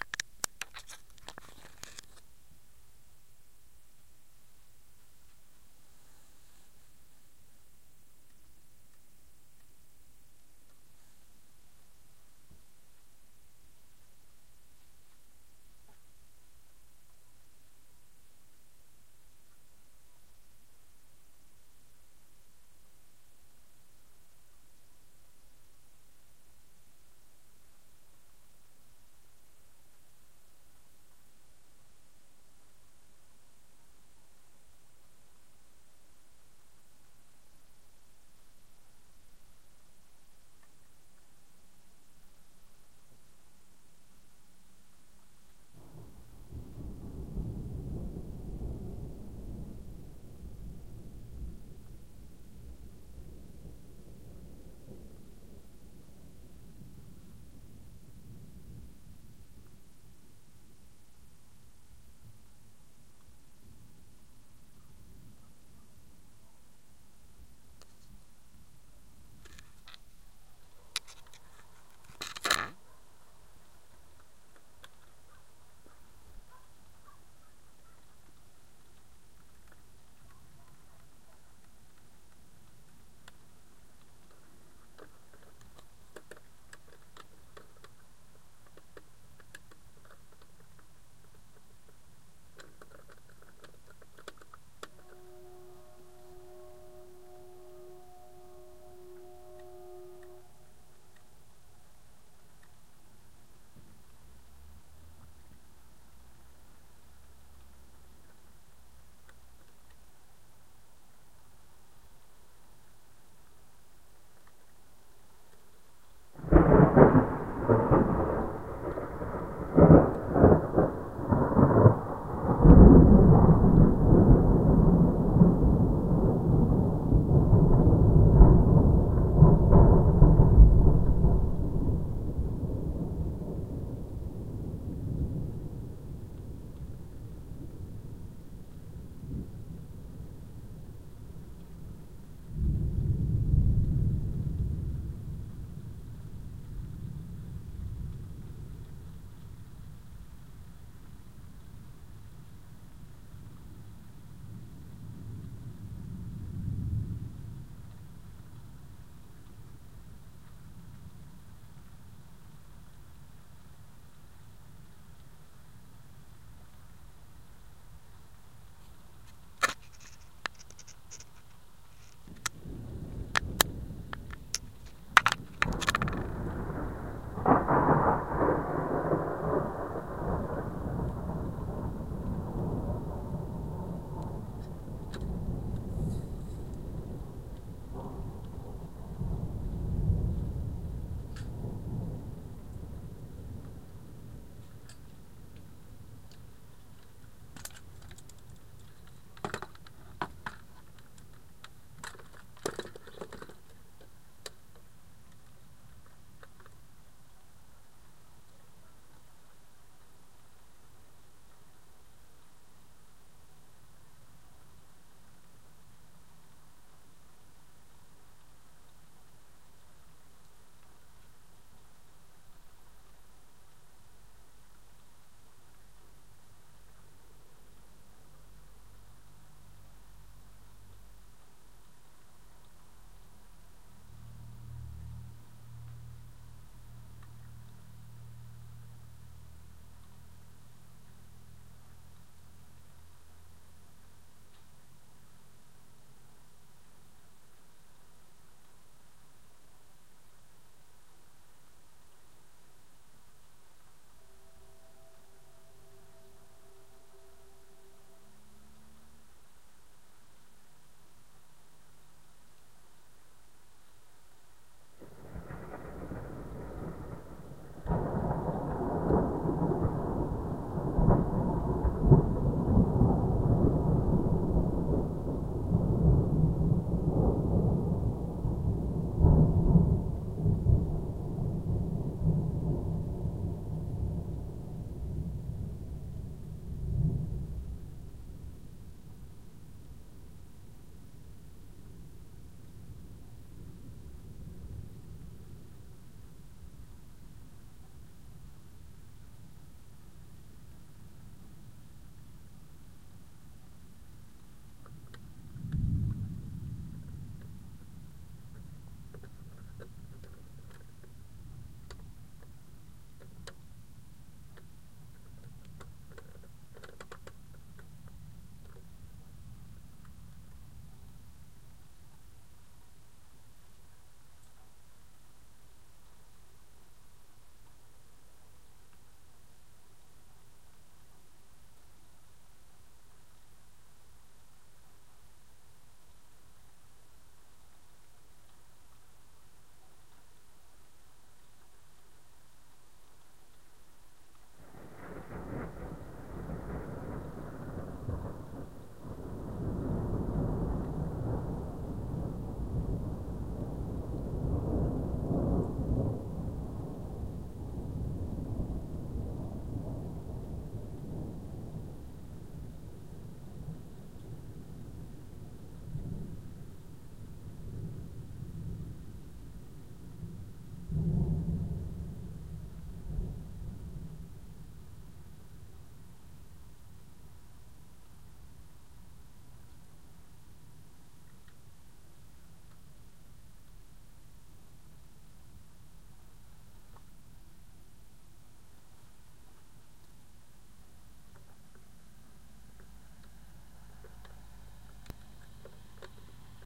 APRL21Lightning
TREMENDOUSLY huge lightning strikes recorded by MP3 player in Hungary, Pécel, in evening on 21st of April, 2008.
thunderstorm, storm, lightning, rain